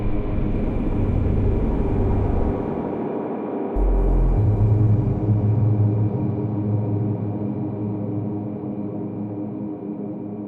ambient 0001 1-Audio-Bunt 7
ambient, breakcore, bunt, digital, DNB, drill, electronic, glitch, harsh, lesson, lo-fi, loop, noise, NoizDumpster, rekombinacje, space, square-wave, synthesized, synth-percussion, tracker, VST